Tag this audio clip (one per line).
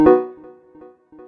application
bleep
blip
bootup
click
clicks
desktop
effect
event
game
intro
intros
sfx
sound
startup